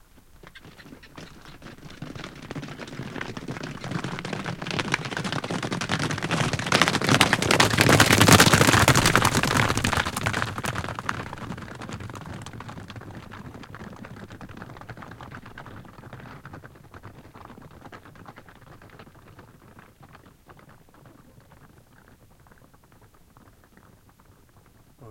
6 horses arriving from far away. Gallop on desert stones. Hooves crossing microphone on the floor. Palmyre, Syria, 2007.
Recorded with stereo XY Audiotechnica AT822 microphone
Recorded on Tascam DAP1